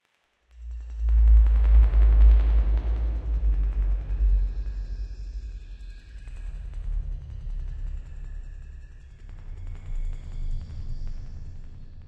low fidgetstop
fidgetspinner, stop